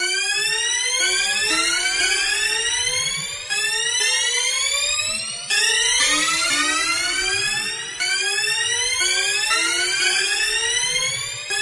BPM140-REBIRTHSTINGS - 24thElement
loop, synth, mystery, electro, sting